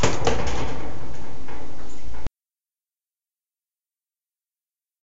cage bang
I dropped (an empty) bird cage in a bath while recording the strange sounds the cage bars reverberation made.
recording, effect, echo, reverberation, bang, cage, live